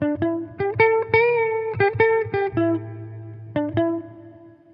electric guitar certainly not the best sample, by can save your life.
electric, guitar